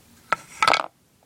wood impact 18
A series of sounds made by dropping small pieces of wood.